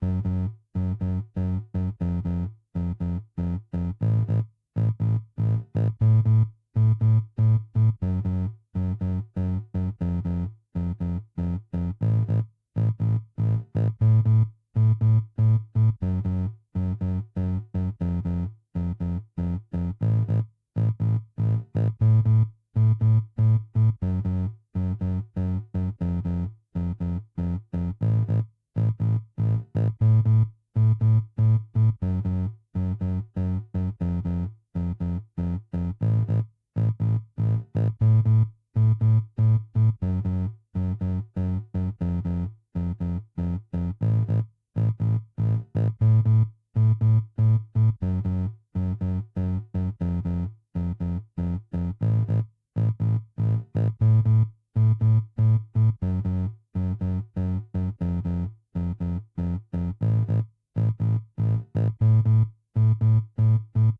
8 bit game loop 007 only bass long 120 bpm
120, 8, 8-bit, 8bit, 8bitmusic, 8-bits, bass, beat, bit, bpm, drum, electro, electronic, free, game, gameboy, gameloop, gamemusic, josepres, loop, loops, mario, music, nintendo, sega, synth